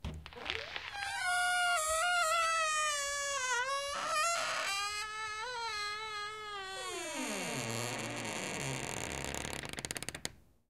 creak - front door 01
A door with creaky hinges being opened slowly.